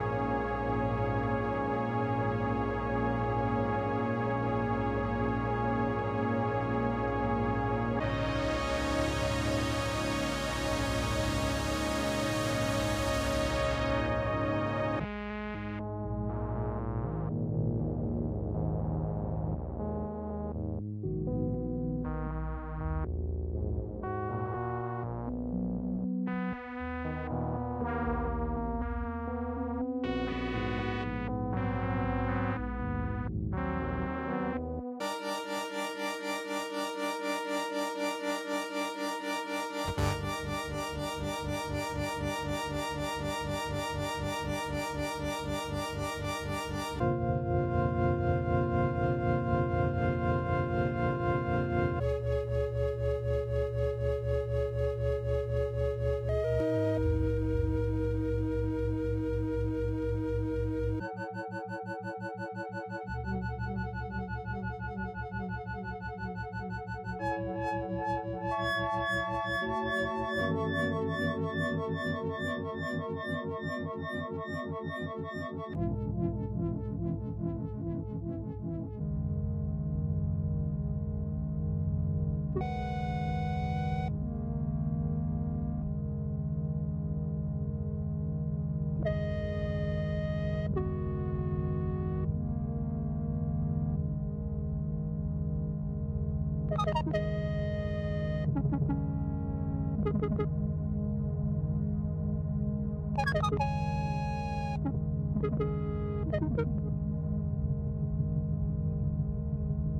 Prophet '08 Morphagene reel
A selection of custom made pads from my Prophet '08, spliced into a reel for the wonderful Make Noise Soundhack Morphagene.